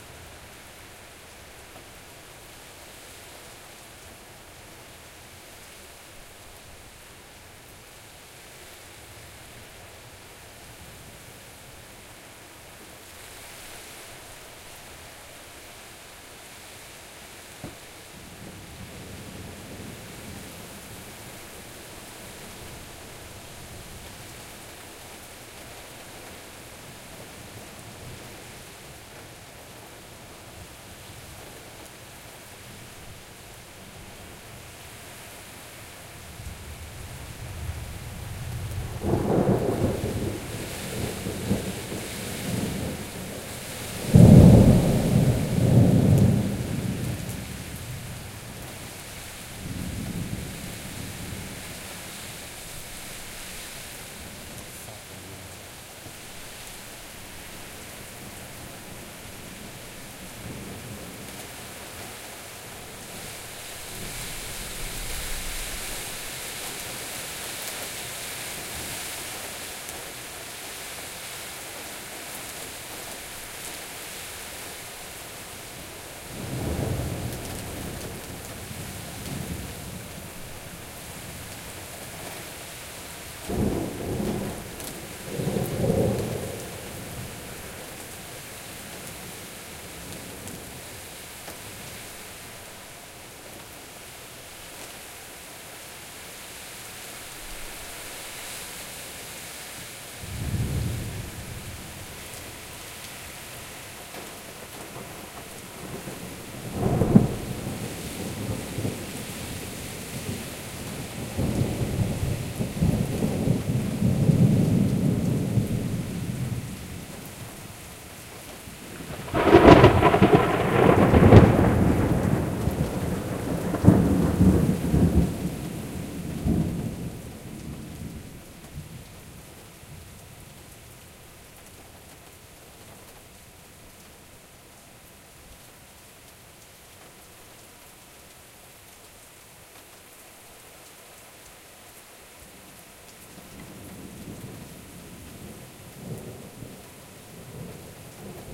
burza loud and clear
2:28 of pouring rain and storm with few far strikes.